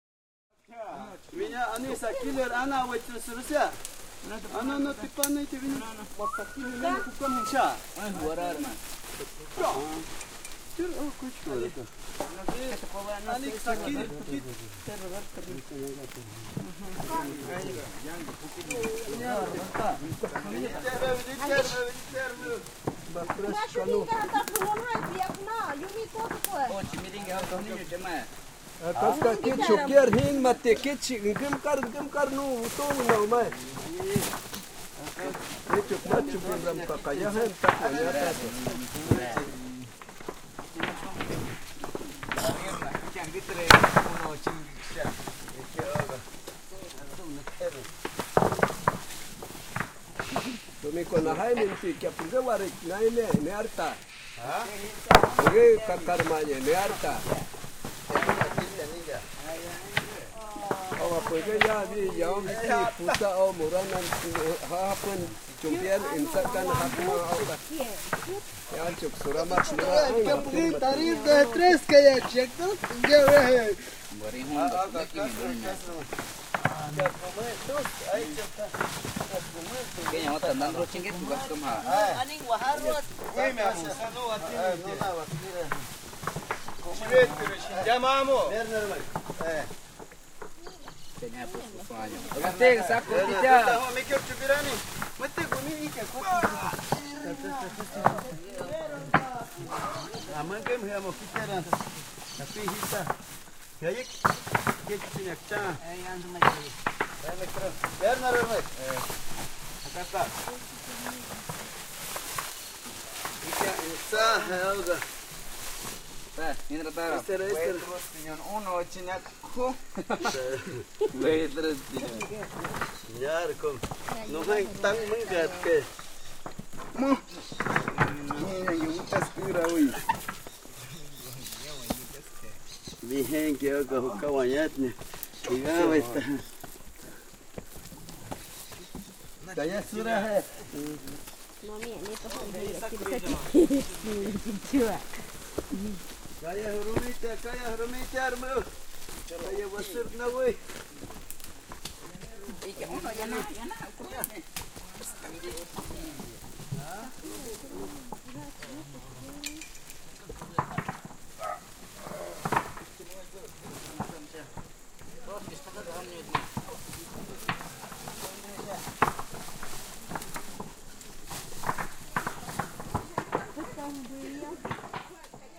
building Sharamentsa Equador
Recording of a building site in a vilage of the Shuar indians in Equador 2011
native-speak, indianer, alone, Mike-Woloszyn, primary-rainforest, equador, insects, speak, stereo, atmo, Woloszyn-Mike, field-recording, animals, building, junglebuildin-side, rainforest, Shuar, night, Woloszy, Tunk, ecuador, jungle, indians, indigenus